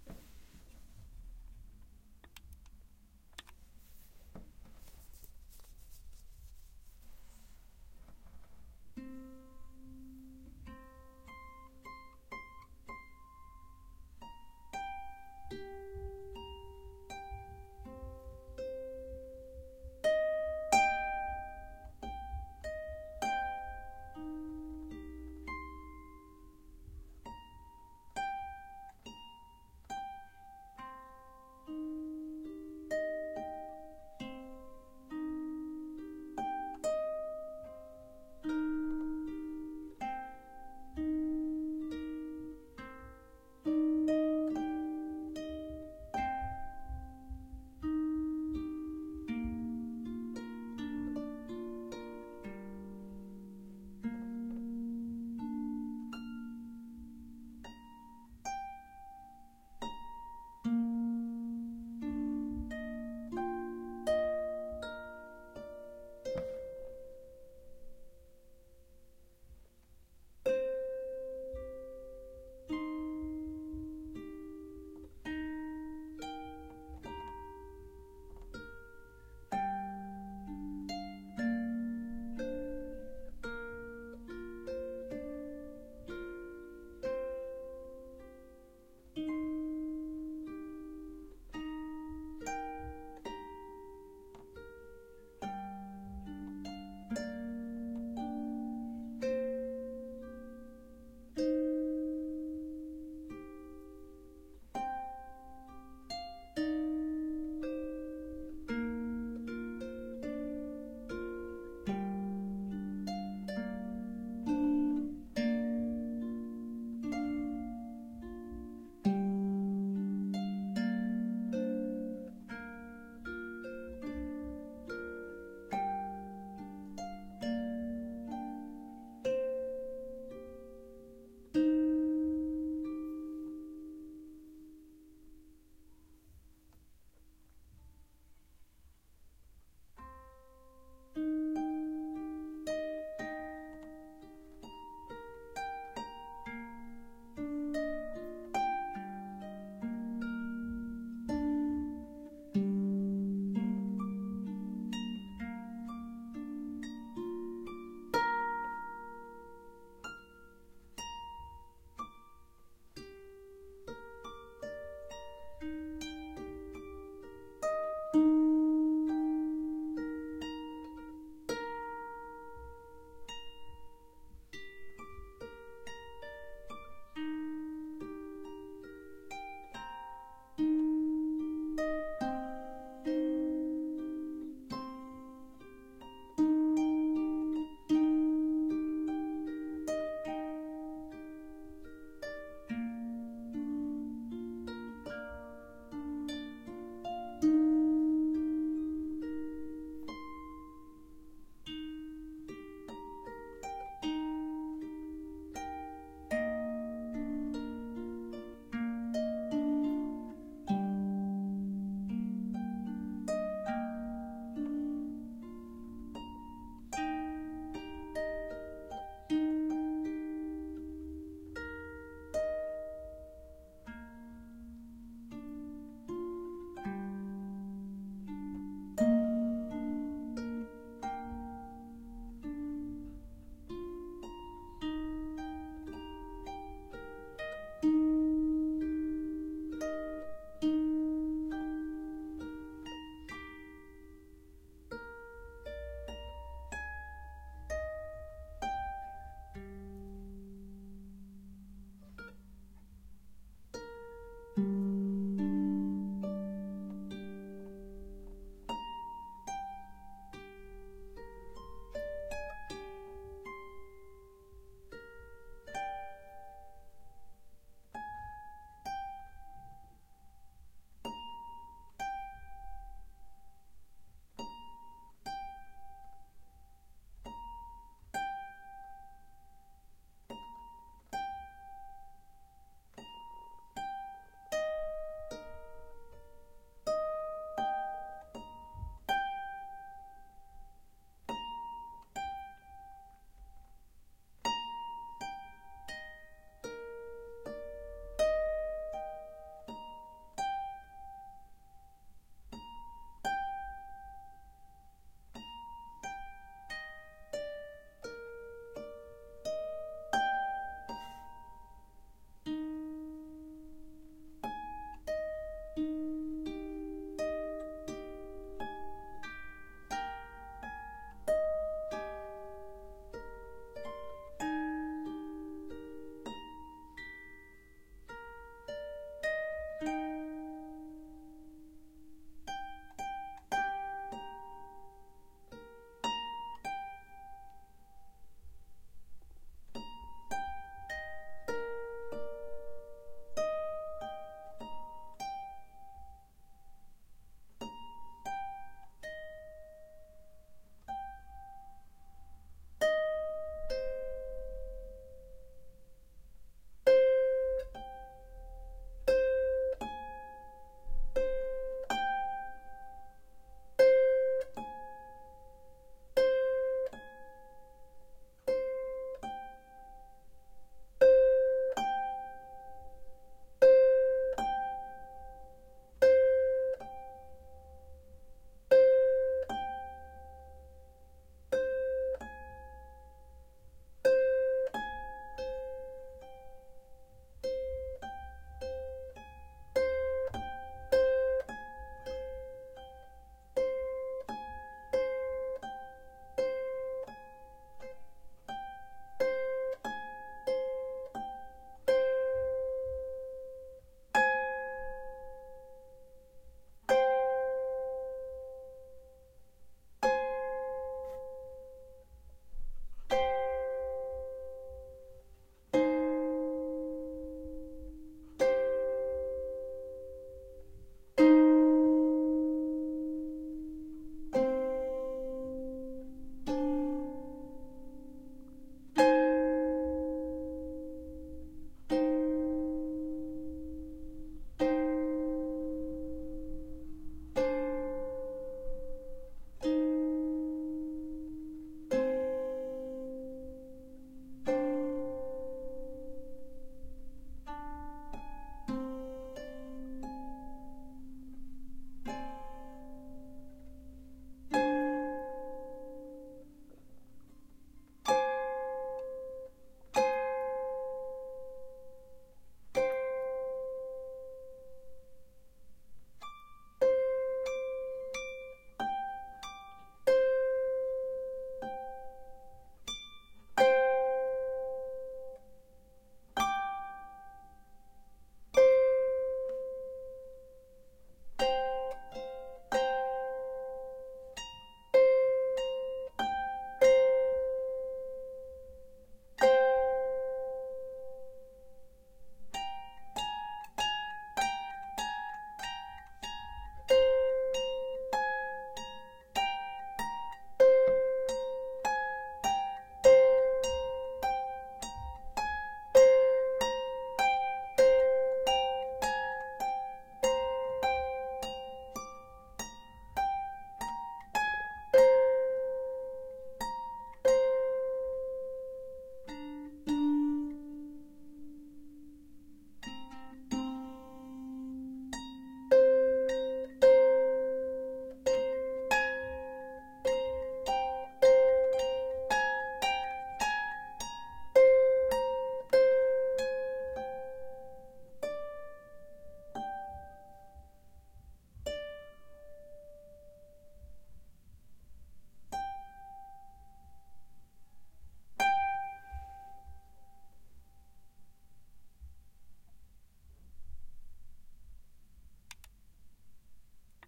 Harp Improvisation Just Take the pieces you need Raw from recording
Plucked, Improvisation, Strings, Ethnic